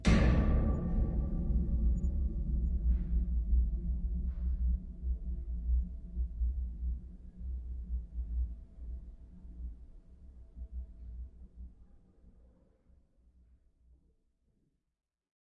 Hitting a 8-story metal spiral stair.
Recorded on a Tascam HD-P2 with two DPA 6001 mics magnet attached inside stair structure.
big, heavy, boom, metallic, impact